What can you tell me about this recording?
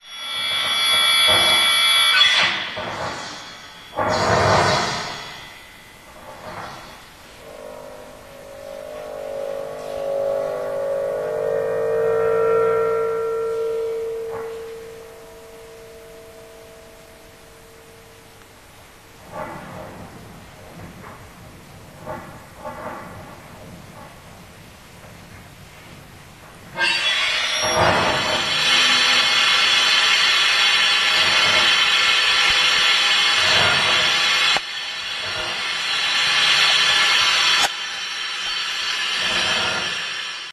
strange loop performance2 081210
08.12.2010: about 20.40 the Strange Loop performance: audio-video-dance performance. Poznan, Ratajczaka street, in Theatre of the Eighth Day seat.
feedback, field-recording, music, noise, performance, poland, poznan, sounds, stage, theatre